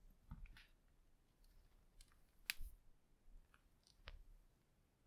Clicking knee 2
I was recording my clicking shoulder and thought I might record some clicks from my knees while I was at it. My knees have clicked since at least my teen years, probably longer.
Recorded this morning with my ageing (soon to be retired) Zoom H1.
crack, bones, cracking, knee, joints